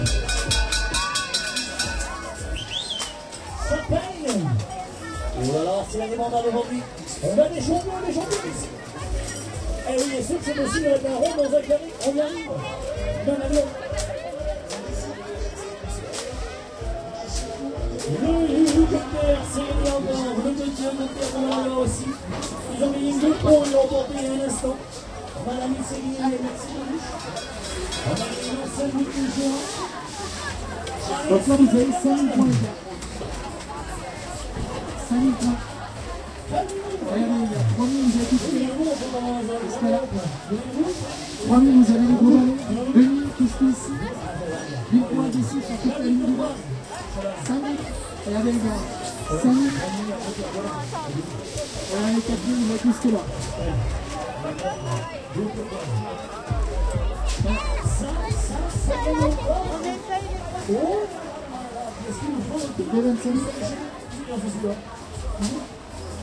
funfair France ambiance and barker
A large fun fair in Lyon France. Two barkers talking, people passing by electronic noises and music in the background. Stereo. Recorded with a Marantz PMD 660, internal mic.
Funfair, crowd, ambiance, France, barker